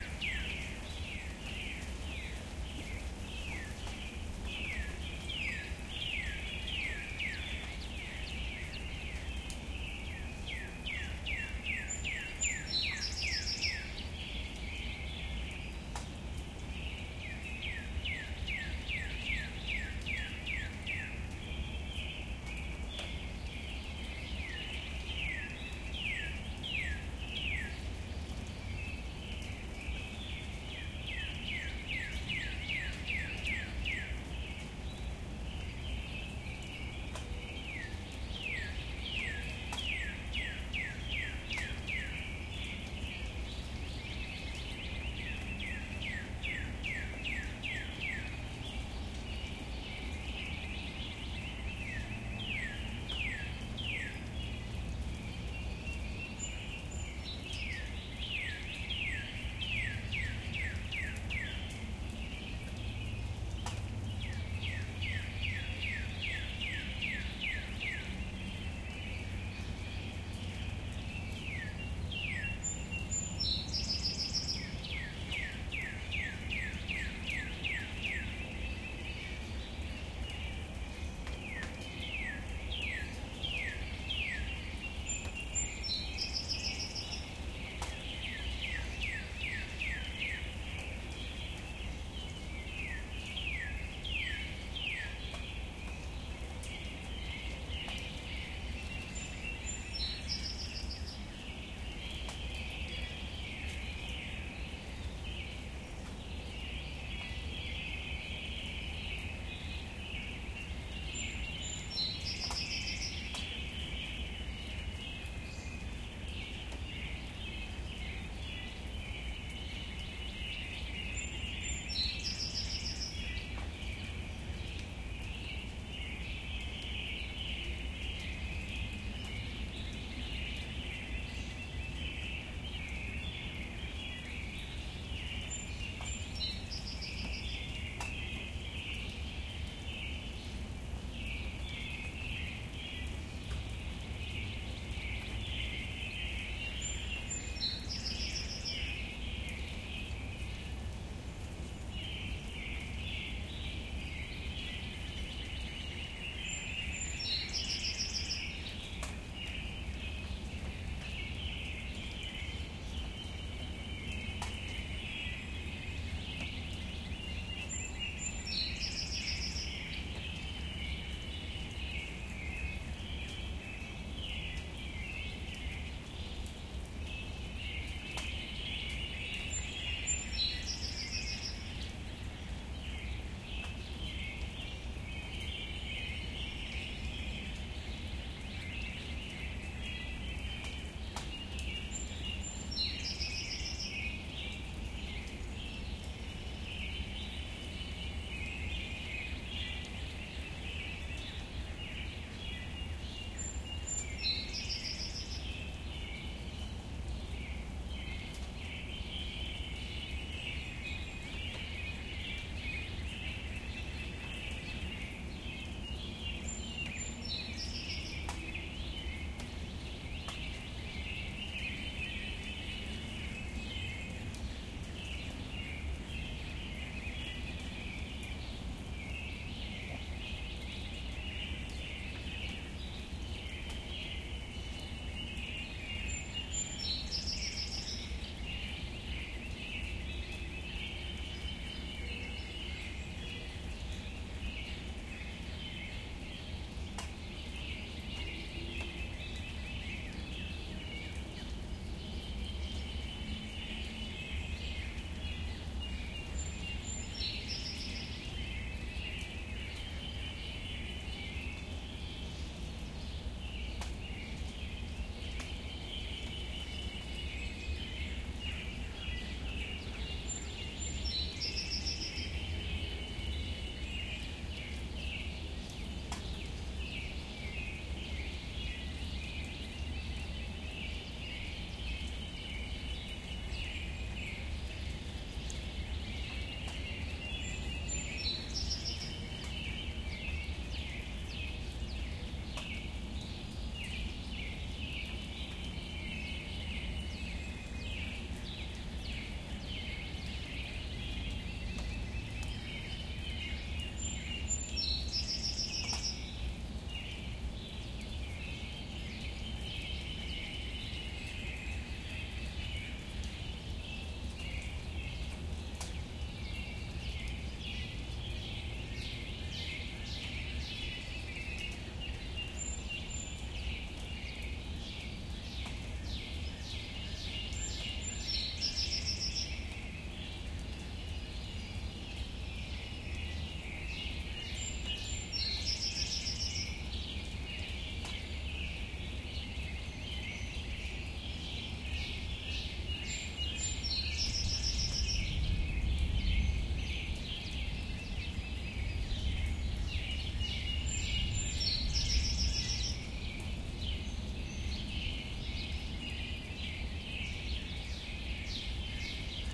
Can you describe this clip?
Some nice birds and some light rain, recorded at dawn, around 5:00 a.m. A cardinal and a song sparrow predominate. This recording is pretty clean for the suburbs and has few extraneous sounds.
2 Primo EM172 Mic Capsules -> Zoom H1 Recorder